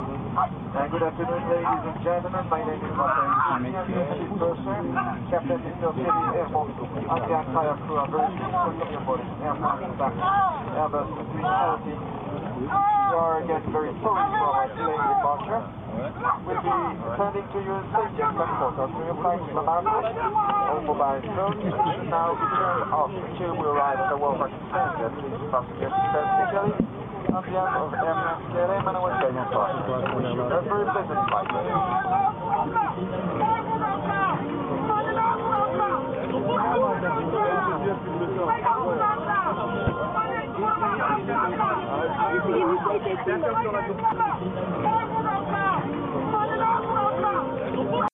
Deportación de ciudadano africano en un vuelo de Air France
African citizen deportation on a flight from Air France